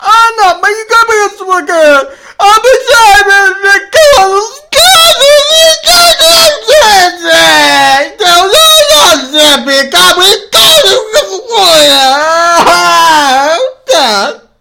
Whiny female
A sad female makes this sounds.